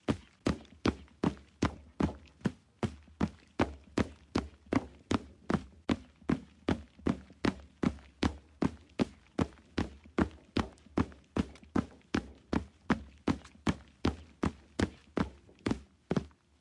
footsteps-wood-bridge-03-running
bridge, field-recording, footsteps, running, wood